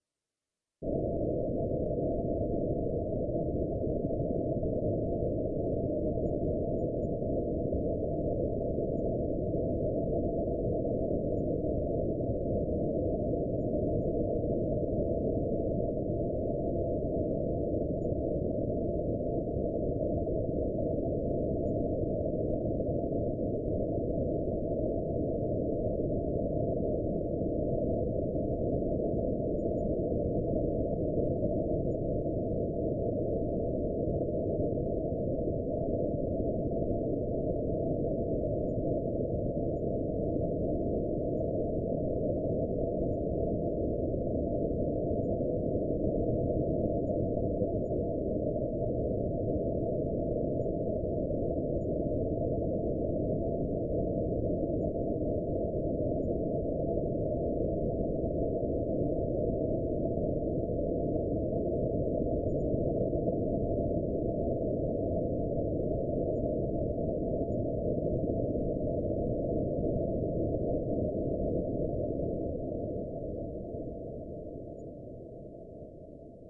orbital bg3
rumble, hover, Room, emergency, fx, effect, soundscape, machine, spaceship, electronic, sound-design, ambient, drone, ambience, background, sci-fi, impulsion, energy, bridge, noise, engine, dark, futuristic, deep, starship, future, atmosphere, space, pad, drive